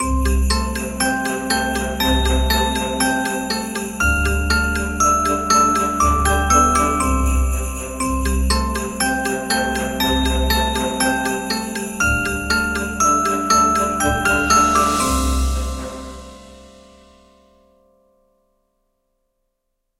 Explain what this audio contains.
xmas bellis5
Especially for Christmas. These sounds are made with vst instruments by Hörspiel-Werkstatt HEF
x-mas, instrument, weihnachten, effect, holiday, wonderland